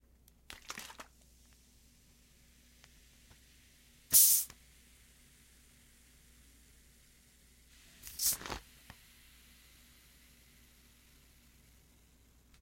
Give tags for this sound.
beverage; bottle; carbonated; coke; drink; drinking; fizz; foley; fresh; opening; soda; soda-bottle

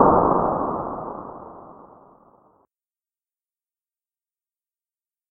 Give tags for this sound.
far; explode; explosives; bang; impact; dynamite; explosion; boom; detonation; shockwave; sample

